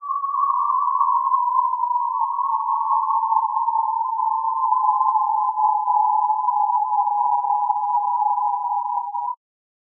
Coagula Science! 10 - Eerie
Synthetic sound.
Made in Coagula.
beam, beaming, energy, science, sci-fi, star, startrek, teleportation, transporter